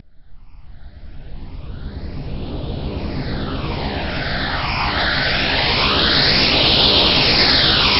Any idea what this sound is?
Noise Rising Low Pass
A noise rising with low pass filter modulation.